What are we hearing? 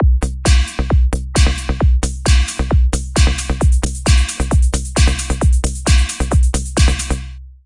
TR LOOP 0302